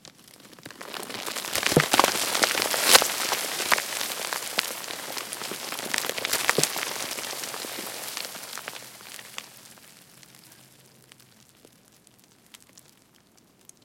Small stone avalanche caused by dislodging some rocks from an overcrop.
Recorded with a Zoom H2 with 90° dispersion.
stone, slide, fall, destruction, avalanche, rock, rubble